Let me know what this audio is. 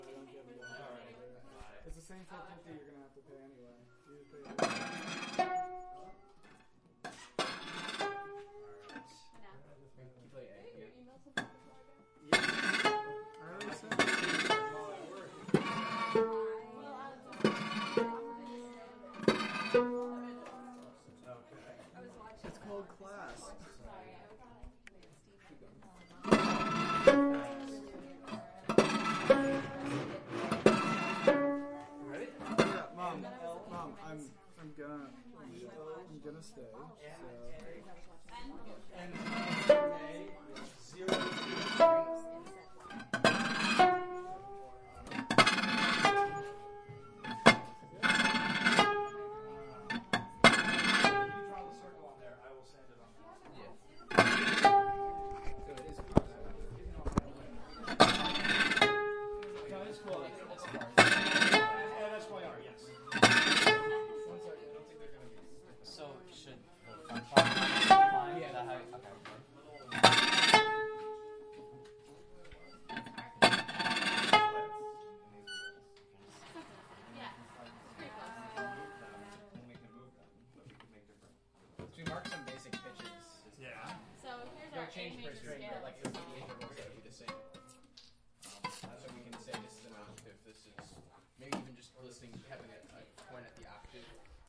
Intonomuri Documentation 03
Recording of students building intonomuri instruments for an upcoming Kronos Quartet performance.
intonomuri, woodwork, workshop